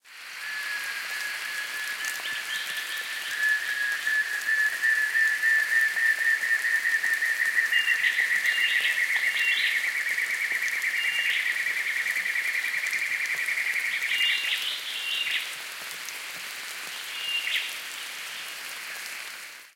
Unknown bird in rainy woods.